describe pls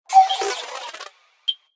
Small Robot P45 GreetHappily
robot small star wars r2d2 happy high battery exhausted sci-fi synth laser space alien fiction science
laser, battery, exhausted, alien, science, fiction, wars, robot, small, star, happy, space, synth, r2d2, high, sci-fi